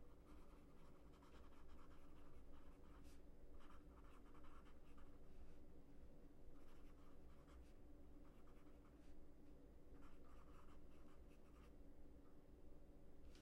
Writing down notes with pencil on a notepad.
scribbling, paper, pen, notes, pencil, notepad, spelling, writing